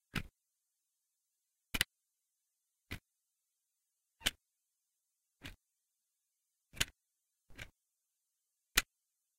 Plastic CD case opening and closing
This is merely the sound of a CD case opening and closing (if anyone is interested, it was the CD for the ending theme of the Cross Ange anime, sung by Eri Kitamura, though that doesn't change the sound...)
This kind of snapping sound can also be used for little things opening and closing. I used this sound for a medicine cabinet sound at one point.